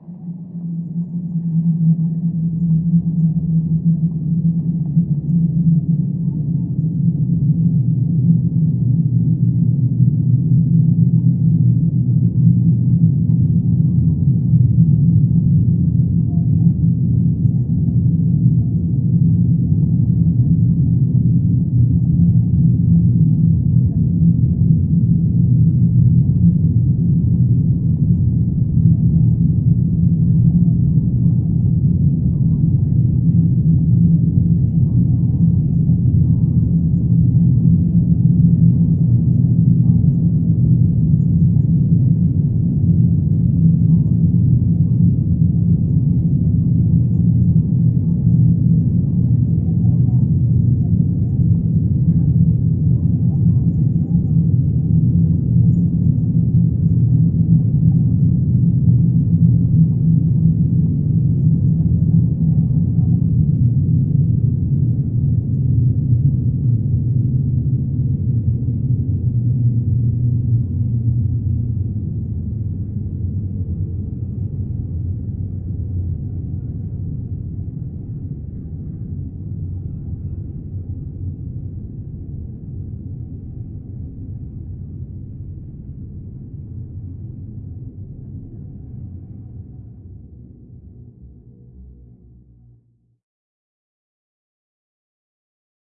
Space Sweep 03
This sample is part of the “Space Sweeps” sample pack. It is a 1:36 minutes long space sweeping sound with lower frequency going down. Created with the Windchimes Reaktor ensemble from the user library on the Native Instruments website. Afterwards pitch transposition & bending were applied, as well as convolution with airport sounds.
drone, soundscape, sweep, space, ambient, reaktor